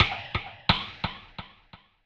delayed band drum